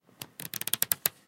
pasar esquinas de hojas
page, pass, quickly